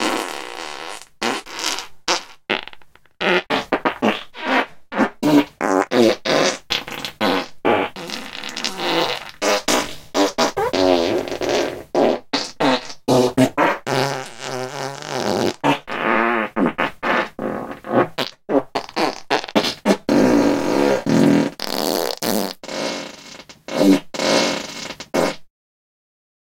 Fart Combo Fast - Dry
High quality studio Fart sample. From the Ultimate Fart Series. Check out the comination samples.